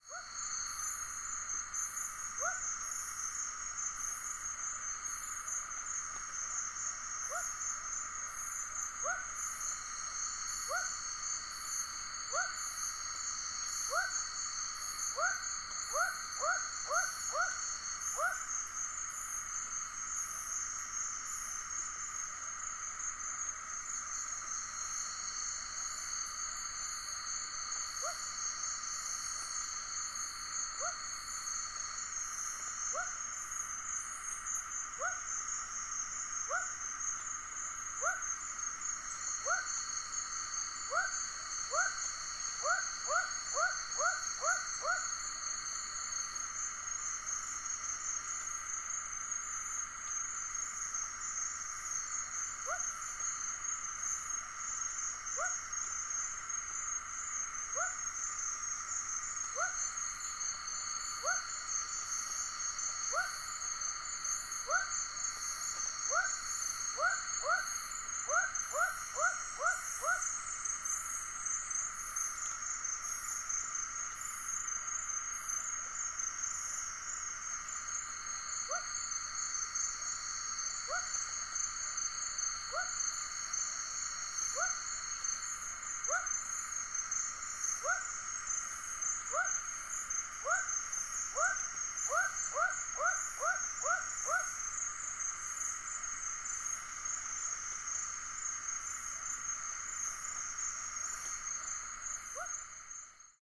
Frog Forest
This was recorded in 2009 in a forest near Mulu in Sarawak, Malaysia on the island often referred to as Borneo.
Ambience, Borneo, Forest, Frogs, Insects, Malaysia, Mulu, Night, Rain, Tropical